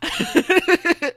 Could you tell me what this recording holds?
more laughing
Do you have a request?